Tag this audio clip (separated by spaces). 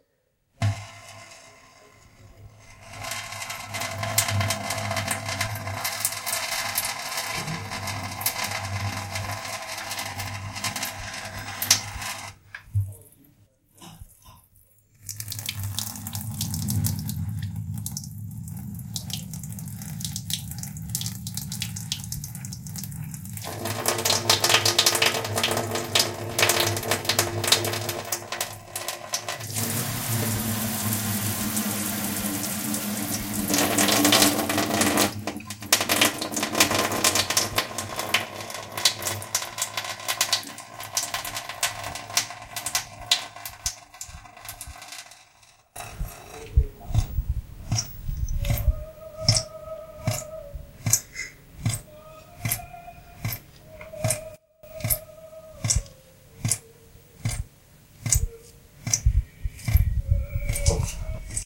tap water